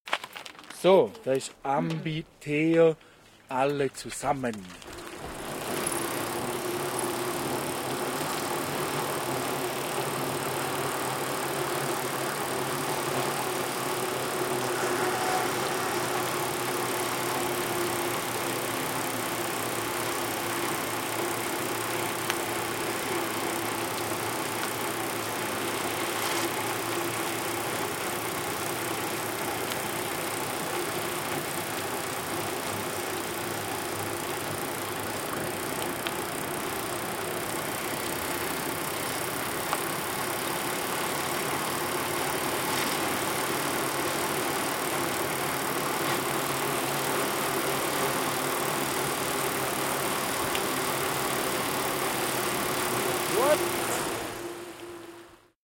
4 Bikes upwards
Original MS-recording of 4 bikes downhilling a road in the mountains.Converted to stereo
fieldrecording, wheel, bike, uphill